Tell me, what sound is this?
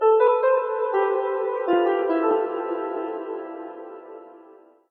2 Dramatic Piano Piano Piano
A pretty good, short, piano line I made up one day. Sounds quite dramatic. Reverberated. It sounds solemn and lonely.
chill, chillout, dramatic, mellow, new-age, piano